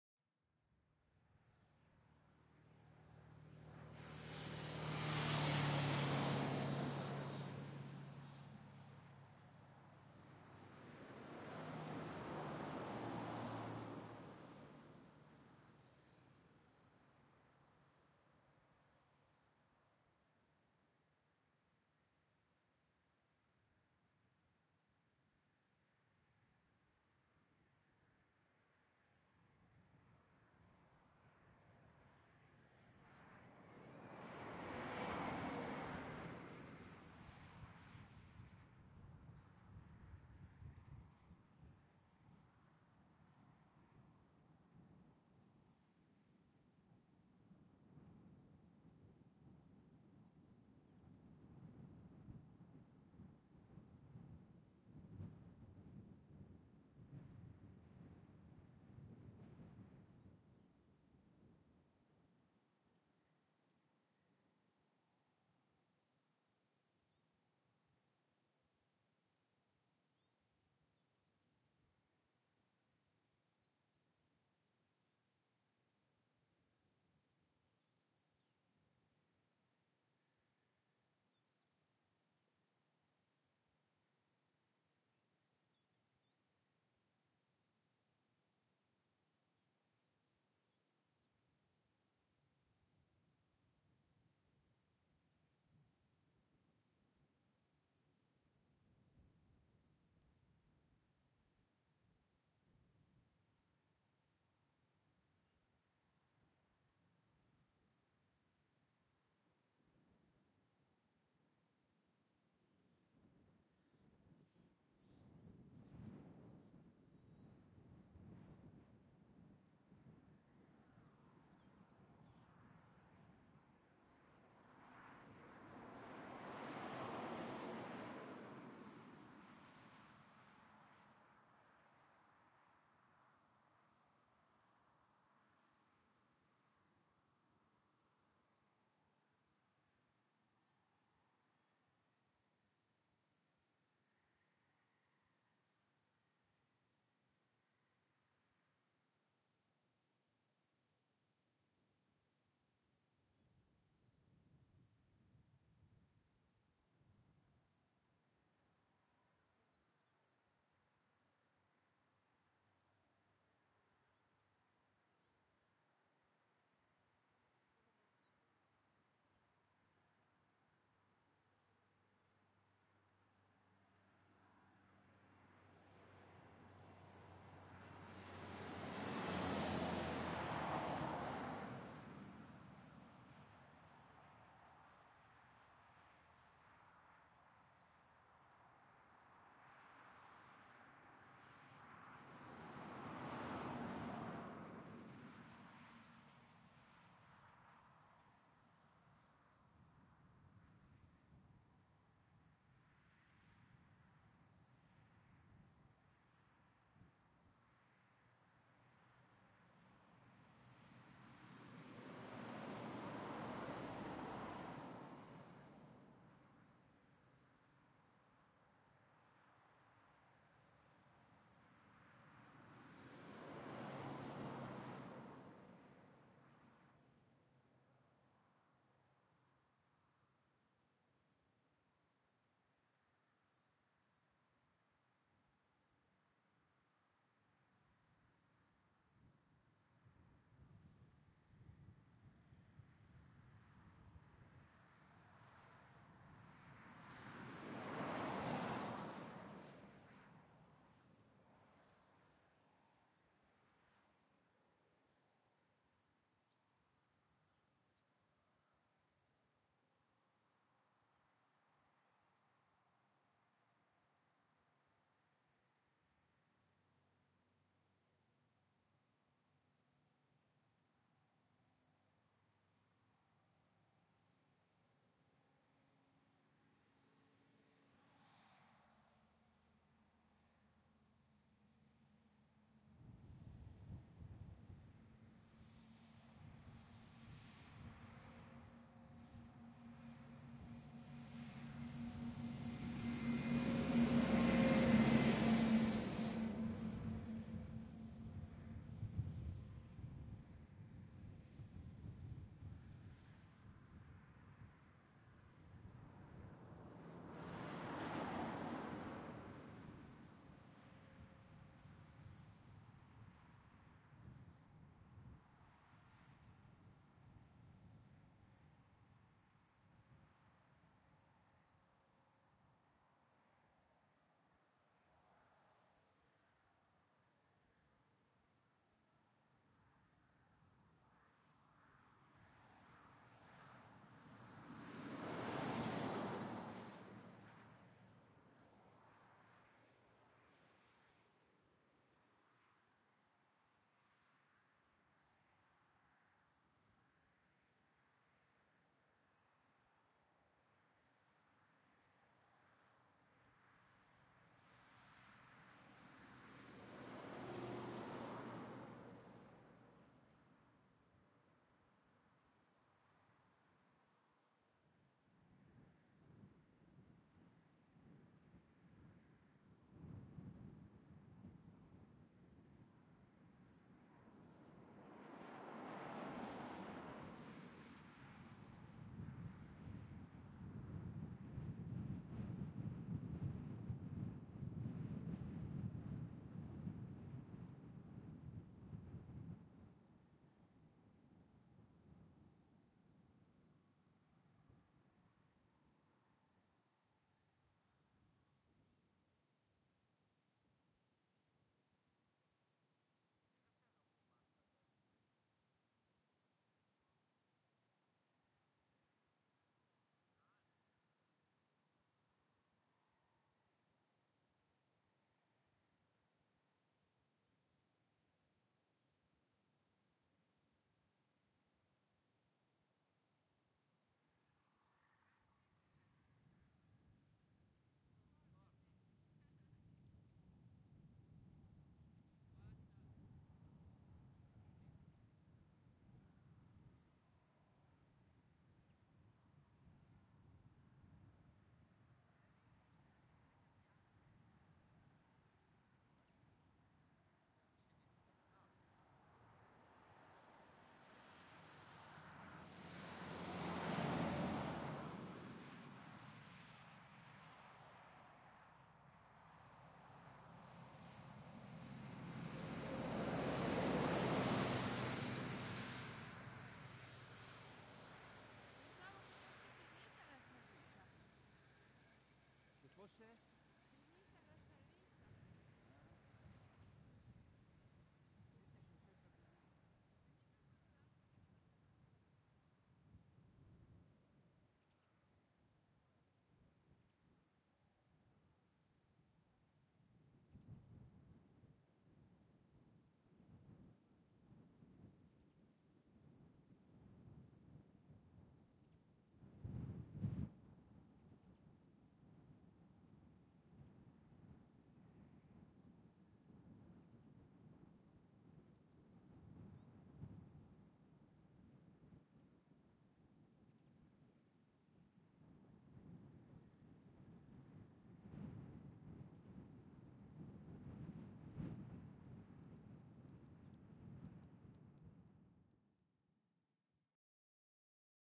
Quiet Road Lanzarote LZ30 Light Wind 1
The first of two early morning recordings on an unusually still day on Lanzarote's LZ-30 highway, which runs through a valley of vineyards. Very quiet ambience. Sparse single vehicles (mainly cars and trucks) passing on a slight incline, so the trucks can be heard labouring slightly. Recorded around 12m from the side of the road. Light intermittent wind. Occasional very distant birdsong and agricultural machinery. Passing pair of cyclists clearly audible between 6m40s and 8m15s. Zoom H4n recorder.
peaceful, country, field-recording, cycling, traffic